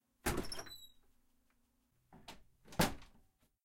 Door opening and closing 9
Wooden door being opened then shut, with light squeak.